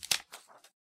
sheets of paper touched and released